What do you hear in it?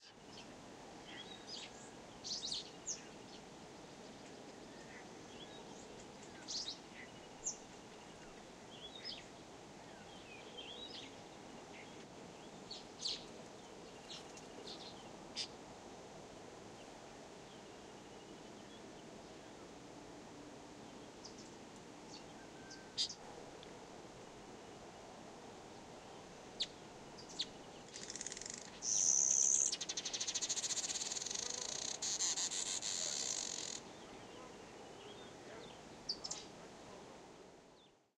Calm Morning Outdoor Ambience
Outdoor ambience of a grass plain outside Rayton (North-East Gauteng, South Africa). Some insects and birds heard calling, most notably the Southern Masked Weaver. Afrikaans talking at the end. Recorded with a Zoom H1.